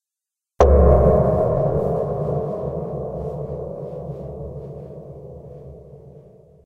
Recorded by striking a hammer against the soundboard of an 1800's upright Brazilian rosewood piano. It was a beautiful piano, but unfortunately beyond repair and too heavy to move. Recorded this on a simple MXL 3000 mic during the process of dismantling it.
Acoustic, creepy, drama, dramatic, Echo, Eerie, haunted, haunting, hollow, Natural, phantom, Reverb, scary, spooky, terror